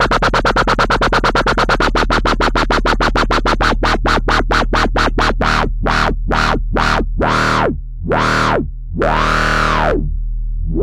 Oh well, I felt like doing a random wobble synth again and putting it over here for free use. Tempo is 133bpm, so just go and cut 'em apart and make a track with them.
tempo, powerful, massive, dubstep, electric, wub, house, 133bmp